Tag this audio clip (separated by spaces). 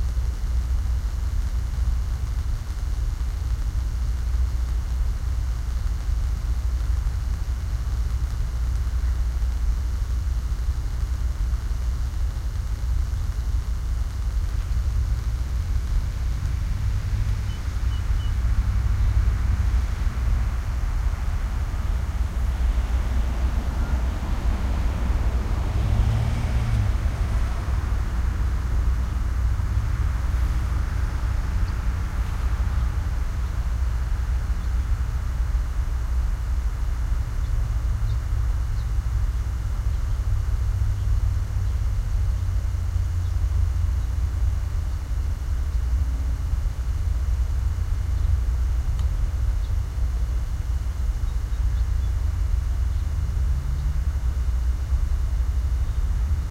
binaural electricity field-recording power-lines